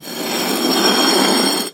This is the sound of a brick being dragged across a concrete floor. Some suggestions for alternate uses could be a for a large stone door or other such thing.